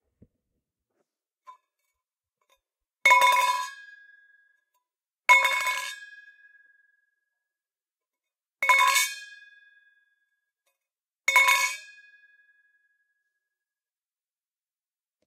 metallic object falling stone floor
Recorded with a zoom H6 with an XY stereo mike
Metallical thermos falling on a hard floor outside repeatdly.